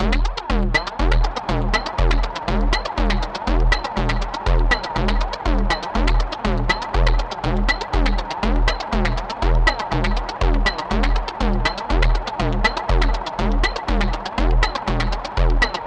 Zero Loop 9 - 120bpm
Percussion, Distorted, Zero, Loop, 120bpm